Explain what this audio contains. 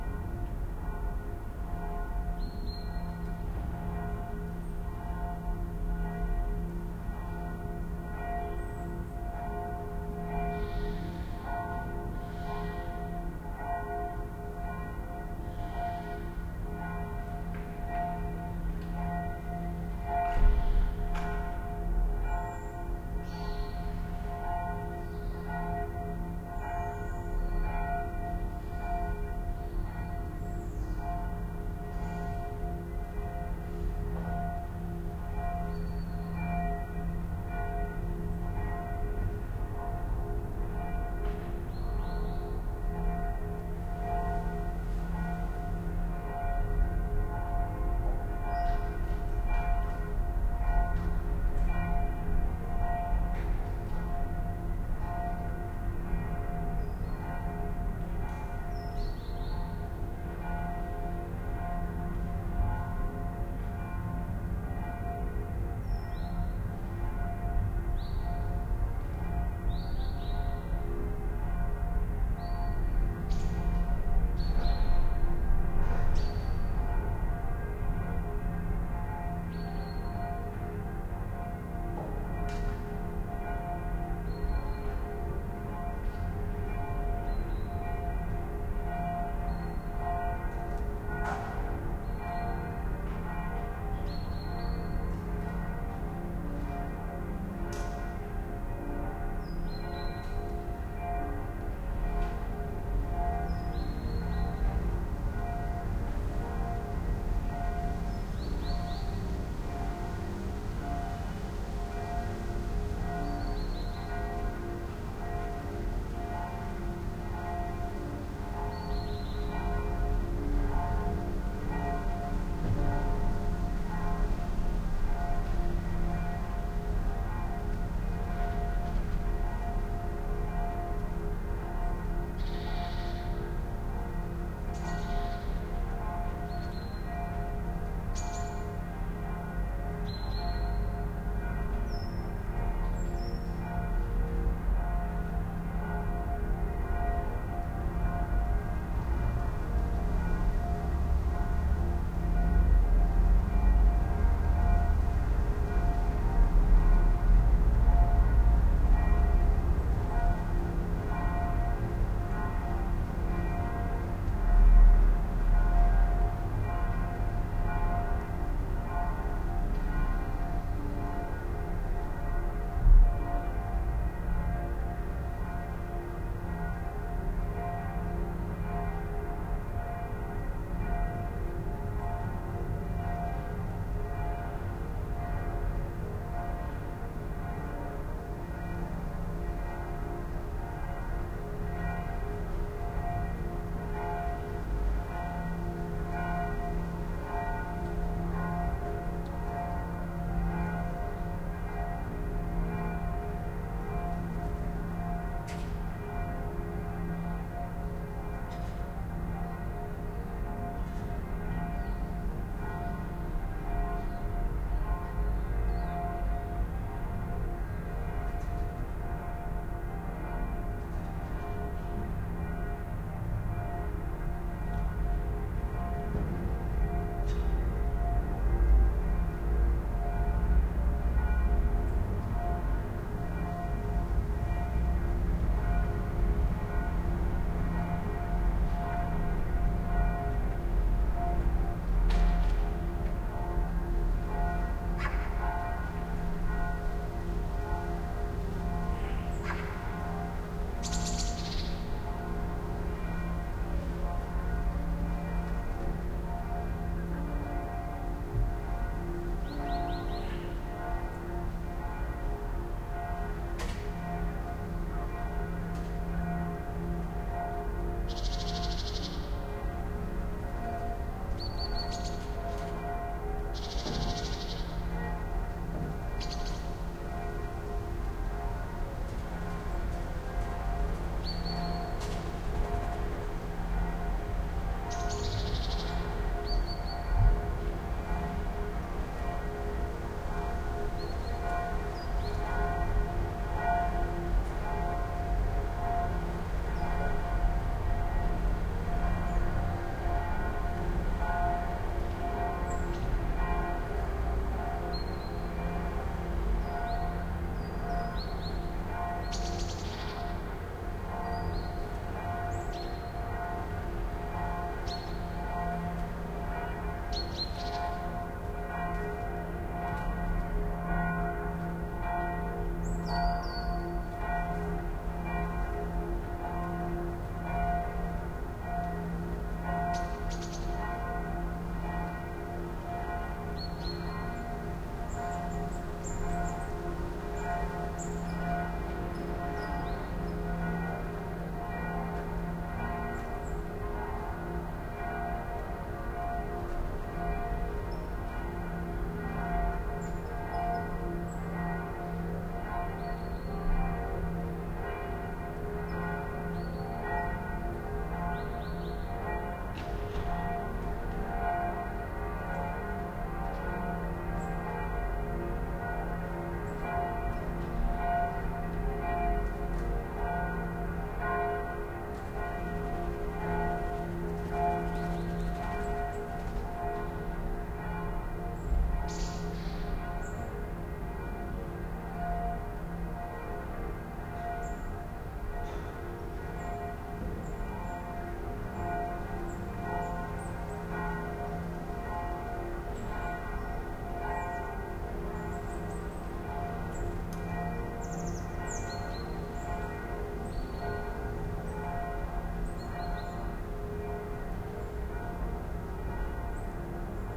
distant churchbells
Shortly before 10 am on a Sunday morning in Hanover / Germany. Churchbells from at least two churches. Sennheiser MKH40 microphones, Shure FP-24 preamp into LS-10 recorder.
bells, church, churchbells, city, field-recording, town